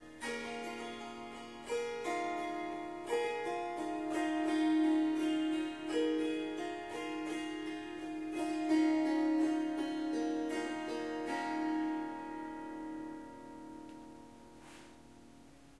Harp Strumming Riff 11
Melodic Snippets from recordings of me playing the Swar SanGam. This wonderful instrument is a combination of the Swarmandal and the Tampura. 15 harp strings and 4 Drone/Bass strings.
In these recordings I am only using the Swarmandal (Harp) part.
It is tuned to C sharp, but I have dropped the fourth note (F sharp) out of the scale.
There are four packs with lots of recordings in them, strums, plucks, short improvisations.
"Short melodic statements" are 1-2 bars. "Riffs" are 2-4 bars. "Melodies" are about 30 seconds and "Runs and Flutters" speaks for itself. There is recording of tuning up the Swarmandal in the melodies pack.
Harp, Swarsangam, Melodic, Surmandal, Melody, Strings, Ethnic, Indian, Swar-sangam, Riff, Swarmandal